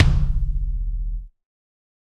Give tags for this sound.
kick; god; set; drumset; realistic; kit; pack; drum

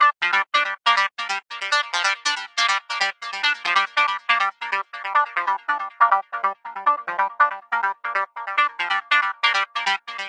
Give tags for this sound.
goa; goa-trance; goatrance; loop; psy; psy-trance; psytrance; trance

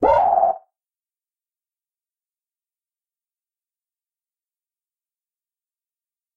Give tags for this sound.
effects; FX; Gameaudio; indiegame; SFX; sound-desing; Sounds

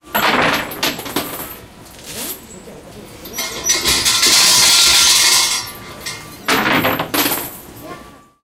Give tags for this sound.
bell; buddhism; coin; coins; field-recording; japan; japanese; kyoto; market; money; religion; ringing; shinto; shintoism; shrine; temple; throw; wood

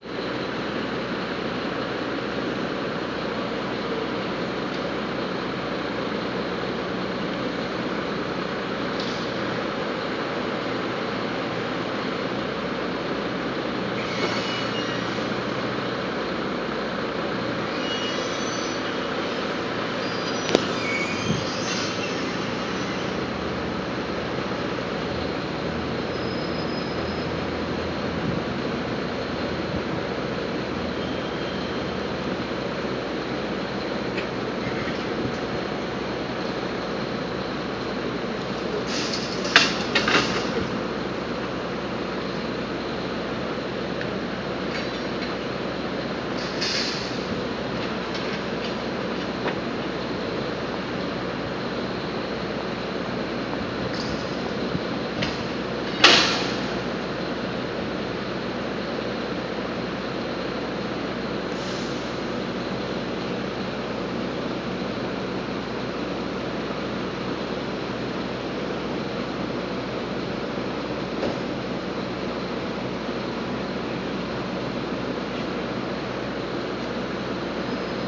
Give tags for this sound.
Building; machines; site

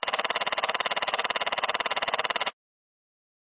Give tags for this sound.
Foley; Machine-Gun; Police

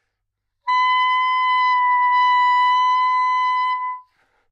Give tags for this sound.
good-sounds; multisample; sax; B5

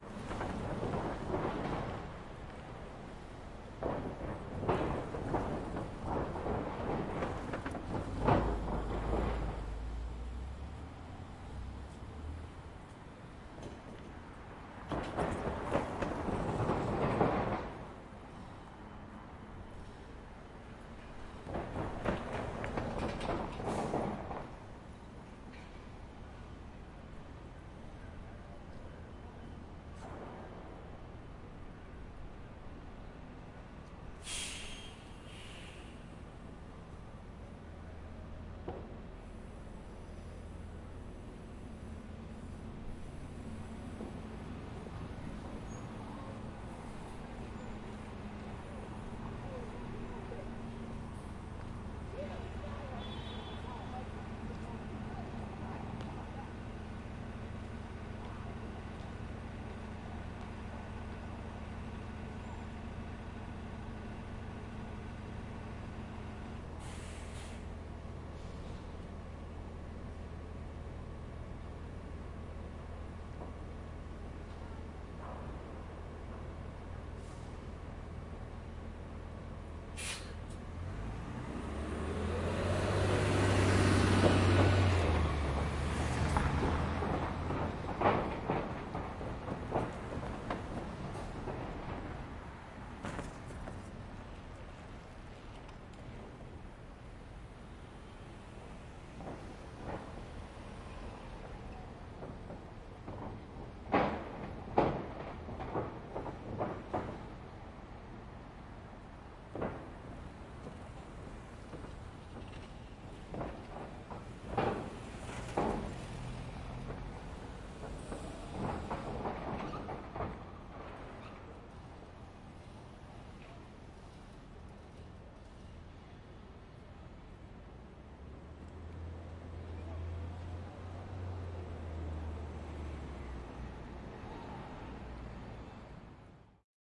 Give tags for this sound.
field-recording; Los-Angeles; AudioDramaHub

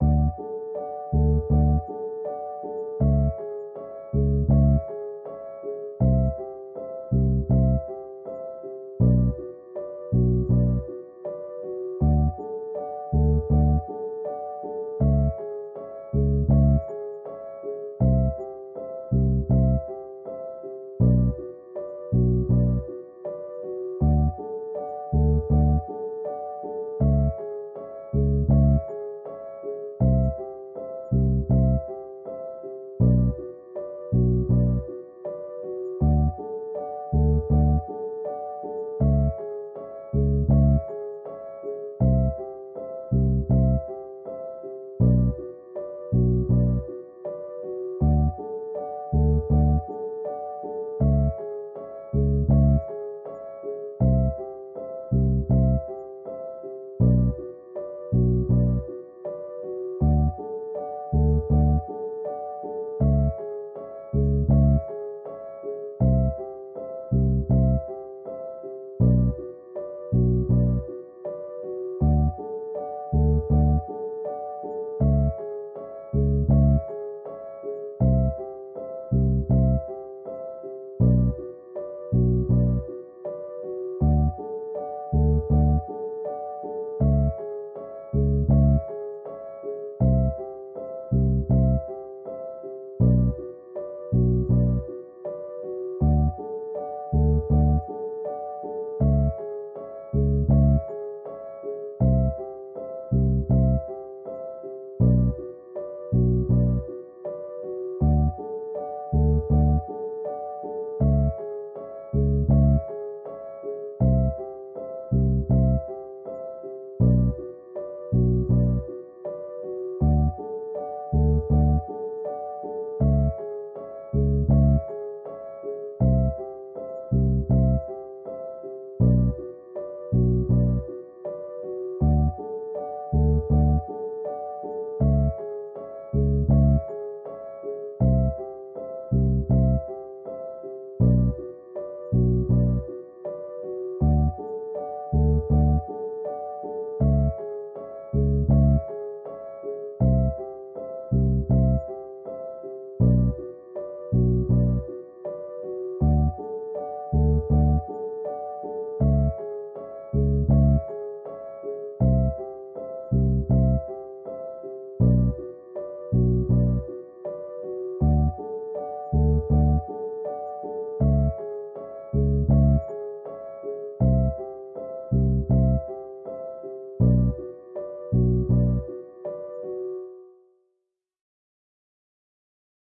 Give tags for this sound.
80; 80bpm; bass; bpm; dark; loop; loops; piano

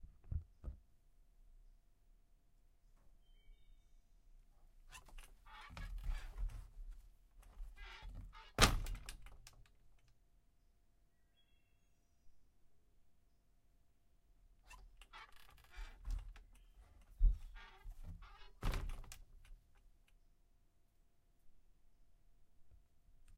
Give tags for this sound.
rusty; door-slam

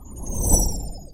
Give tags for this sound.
sparkly; whoosh; flyby; asteroid; meteor; star; passing; tinkly; doppler; comet

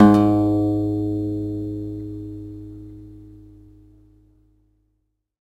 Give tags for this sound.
acoustic; guitar; multisample

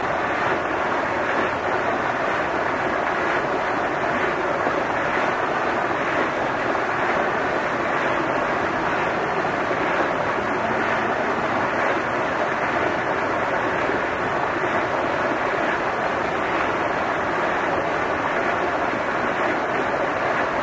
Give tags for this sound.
underwater; hydrophone; field-recording

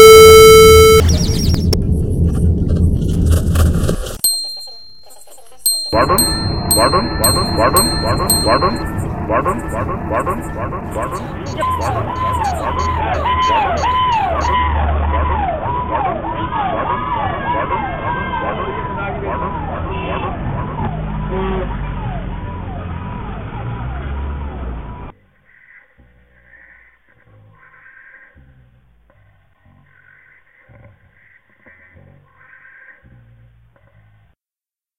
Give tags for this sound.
city; collected; silences; questions; sounds

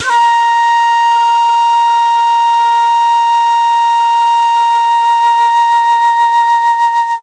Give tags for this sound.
Dizi; Flute

